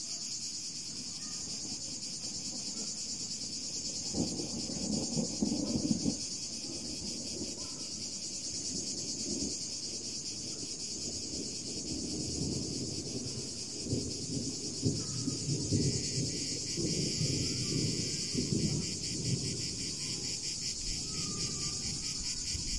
Thunder & Cicadas
Recording in the countryside in Provence with a thunderstrom approaching.
Nature, Storm, Thunder, Field, Field-recording, Countryside, Provence